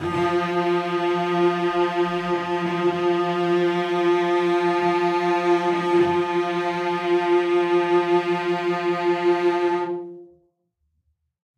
One-shot from Versilian Studios Chamber Orchestra 2: Community Edition sampling project.
Instrument family: Strings
Instrument: Cello Section
Articulation: vibrato sustain
Note: E#3
Midi note: 53
Midi velocity (center): 95
Microphone: 2x Rode NT1-A spaced pair, 1 Royer R-101.
Performer: Cristobal Cruz-Garcia, Addy Harris, Parker Ousley
esharp3, vibrato-sustain, cello-section, midi-note-53, midi-velocity-95, cello, strings, multisample, vsco-2, single-note